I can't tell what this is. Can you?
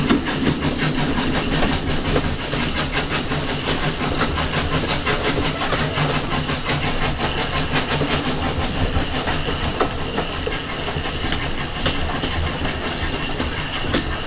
On board a steam train, steaming hard up hill